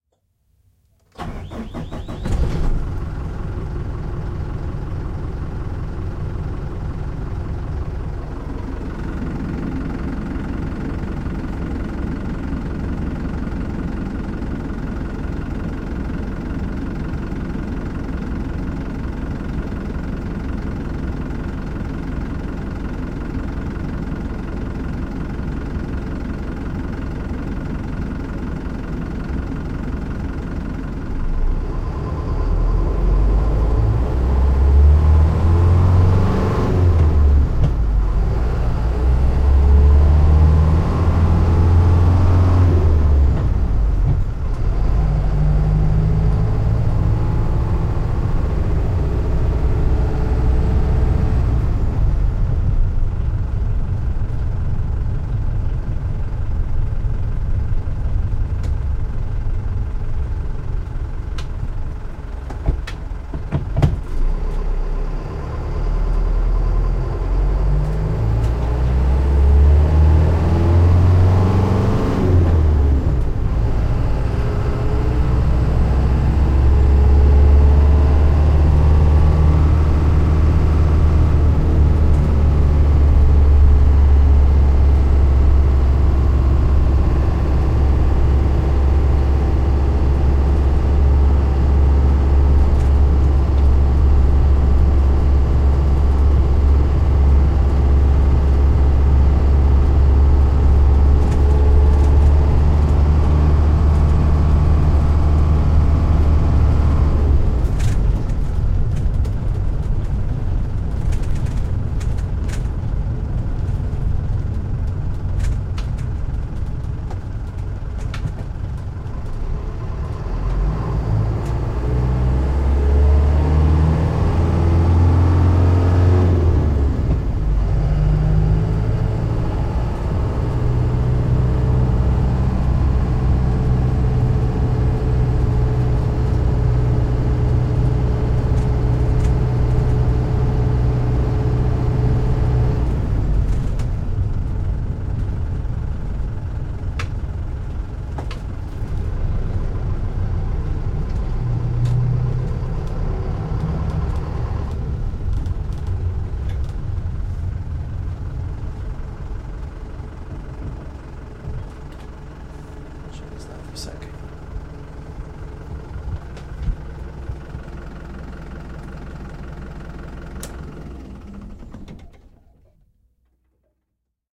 Minibus SFX - start, idle drive, stop, engine off
Interior start, driving, stop and engine shut off of a toyota minibus.
Recorded with Holophone H3d and Rode NTG3 through a Sound Devices 788T.
Lightly processed through Pro Tools.
idle, bus, driving, vehicle, minibus